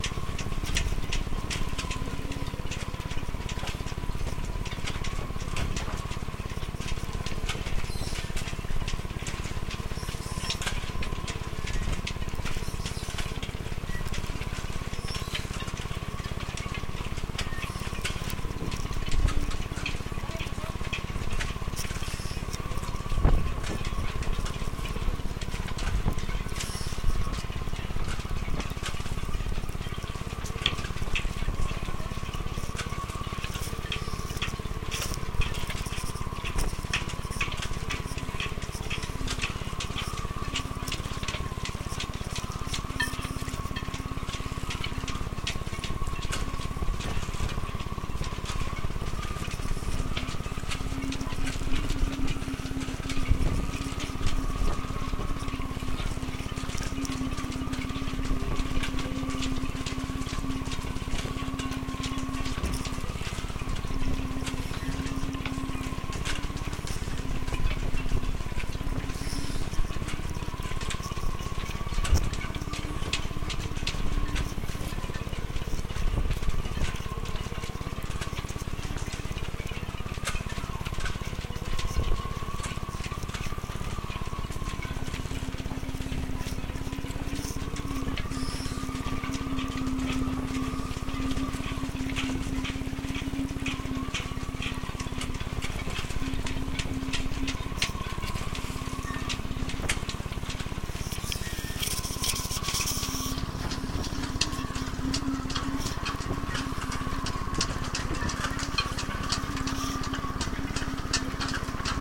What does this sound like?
Yachts in the wind I - Marina Kornati Biograd na Moru
ambience, ambient, breeze, field-recording, voices, wind, yacht